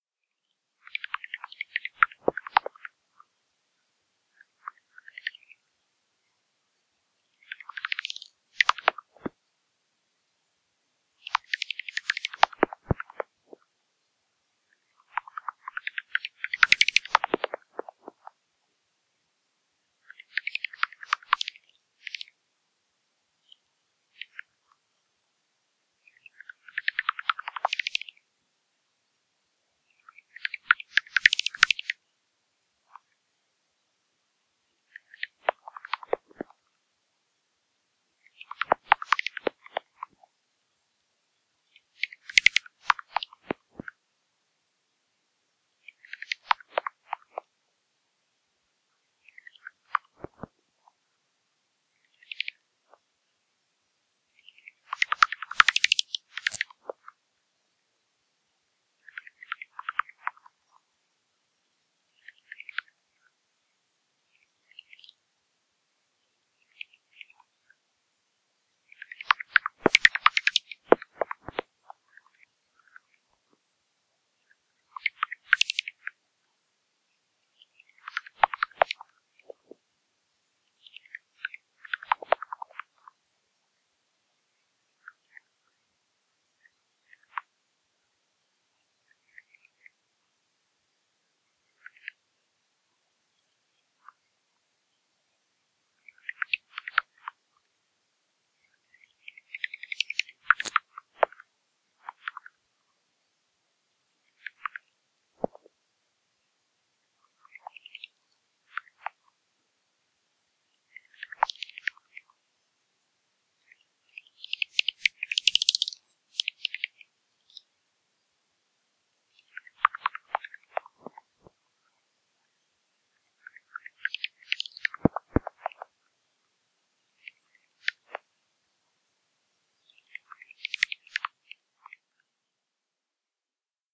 Bats in East Finchley
bats, Nature, location-recording